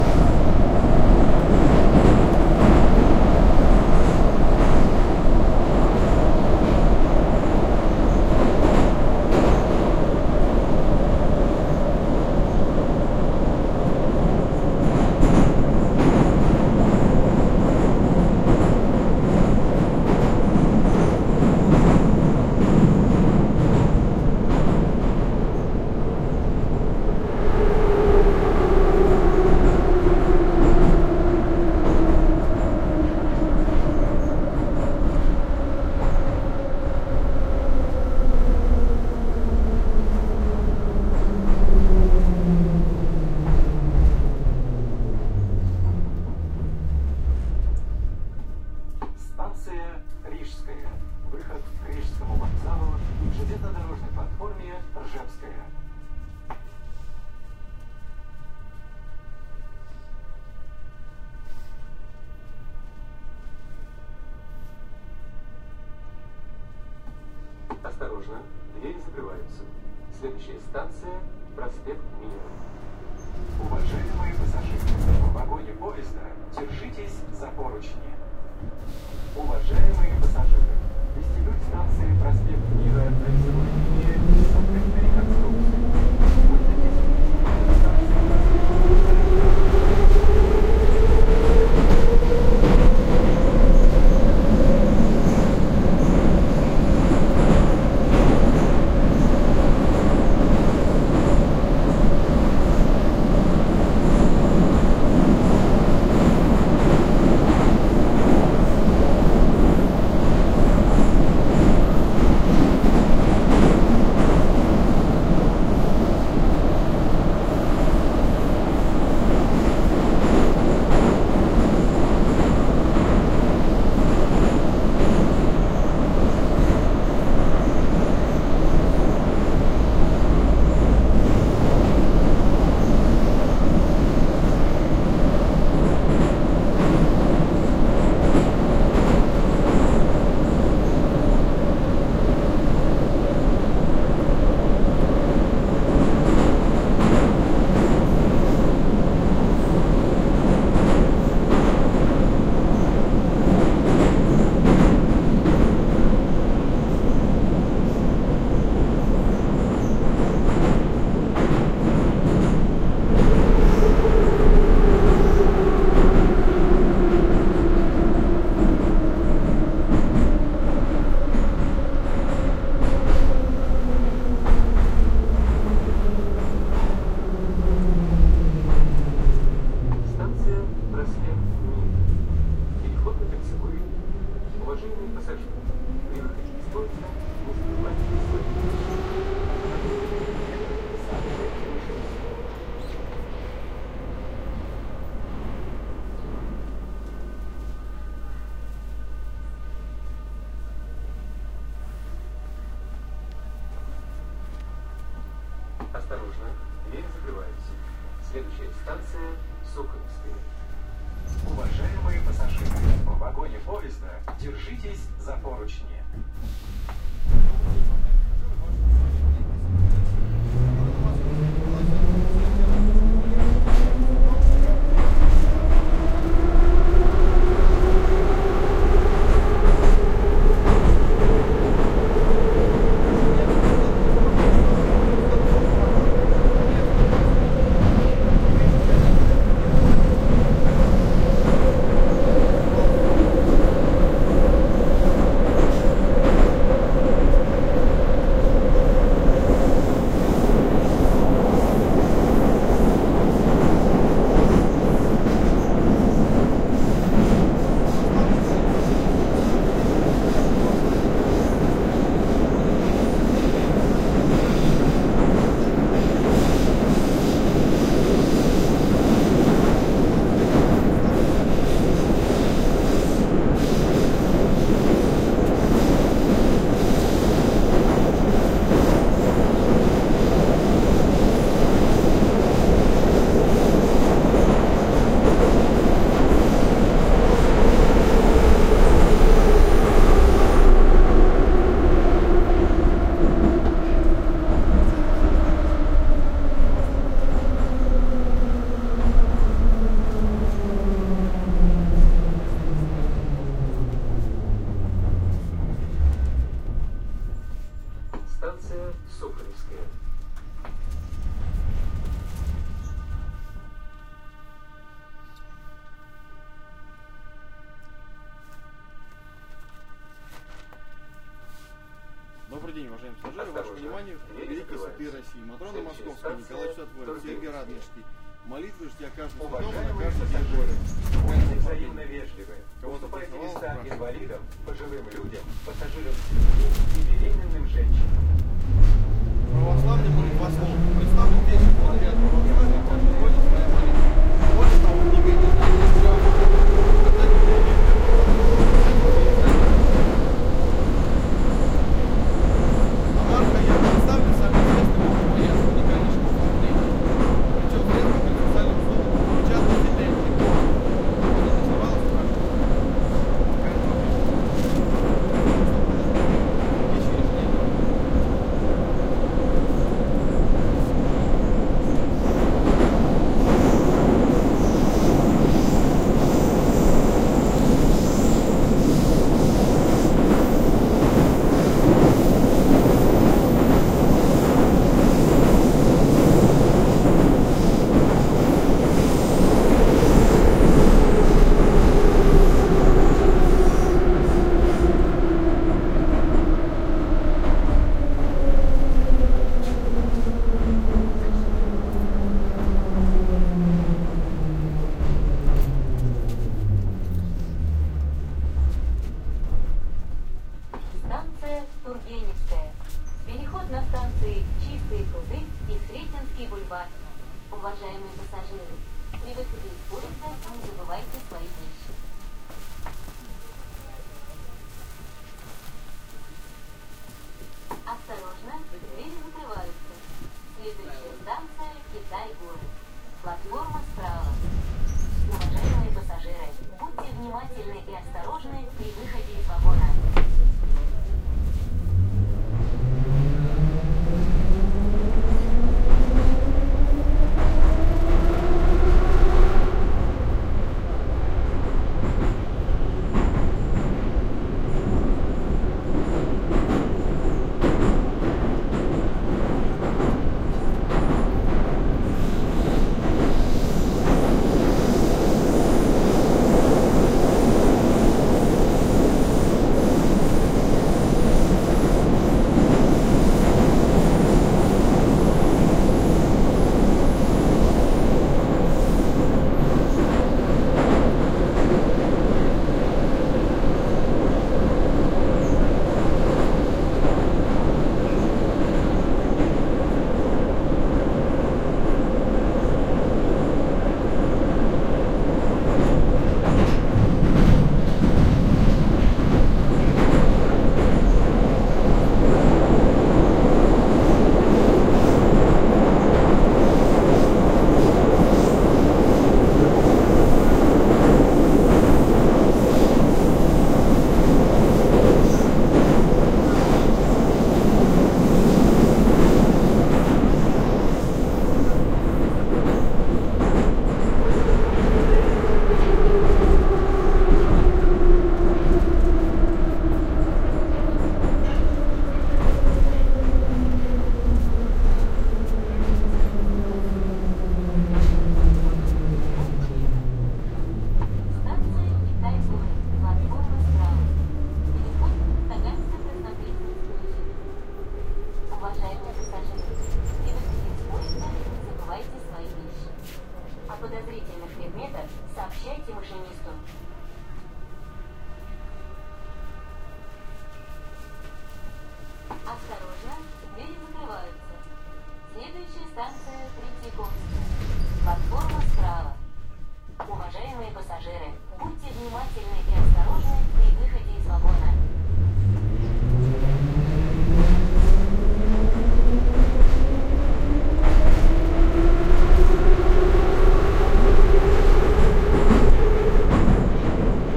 Moscow subway train ride - interior ambience, people talking, intercom announcements, some guy trying to sell some books to passengers.
Made with Roland R-26's built-in OMNI mics.